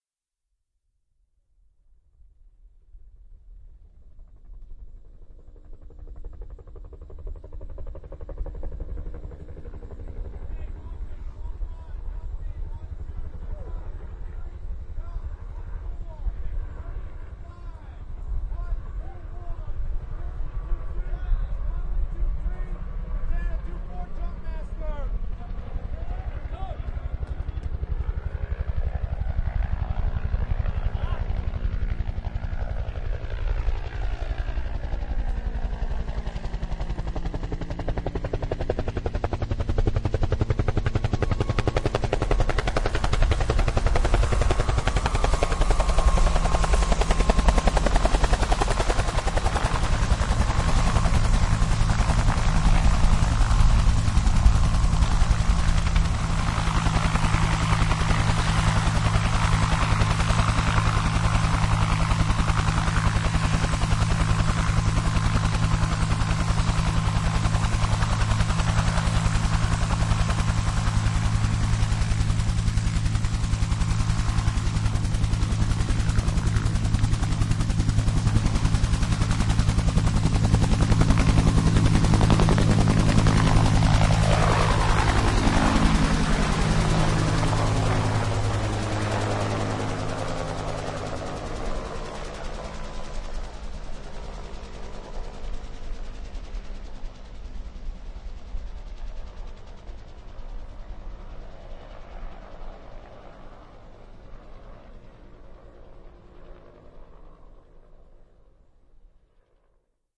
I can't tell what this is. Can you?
Three recordings of a UH-1 Helicopter landing, loading passengers and then taking-off. Each of the recordings is slightly unique based on where it landed and wind conditions.
ambient, helicopter, huey, landing, take-off